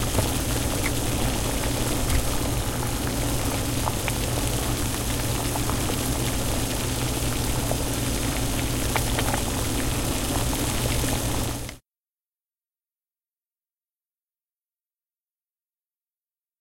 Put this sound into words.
Stereo Recording of Boiling Water
Boiling, Water, Bubbling, Hot-Water, Bubbling-Water